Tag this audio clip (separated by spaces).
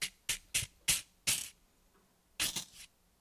brush hits objects random scrapes taps thumps variable